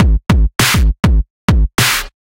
101 Dry glide drum s02
standard riphop drums